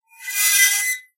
Squeaky Scrape 11
squeak, scrape, metallic, rusty, squeaky